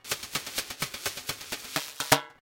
dub drums 018 overdrivedspacebrushes
up in space, echomania, crunchy